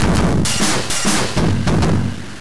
100 Studio C Drums 05
bit, crushed, digital, dirty, drums, synth